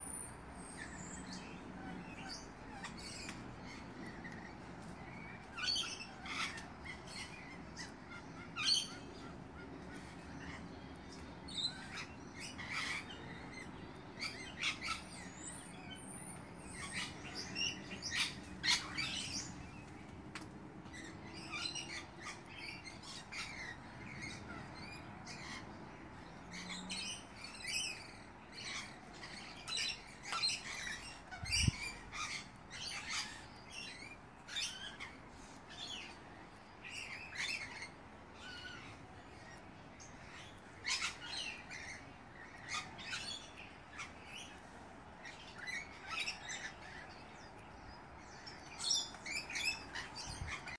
Lorikeets near the back door
Lorikeets feeding on Lilly Pilly fruit
Lorikeet, Nature